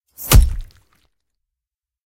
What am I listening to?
Nasty Knife Stab 2
An up-close stabbing/hitting sound in horror movie style. This one is slightly heavier than the previous stab sound, which had a more cartoonish character. It's a layered mix of vegetables being cut, a hit on a punching bag, knives slicing against each other and some juicy sounds of someone preparing and eating fruit.
stab, knife, gore, guts, kill, blood, horror, axe, thriller, killing